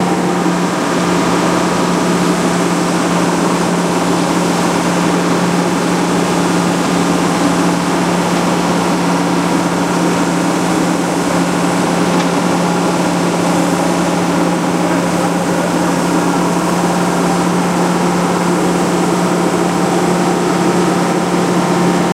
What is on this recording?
machine sound
Sound of a machine;
you can loop it; recorded a excavator
COMPRESSOR, Generator, Machinery, construction, excavator, machine, mechanical, steamengine